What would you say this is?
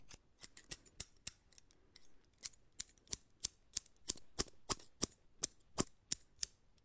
scissors open close2
close, movement, open, scissors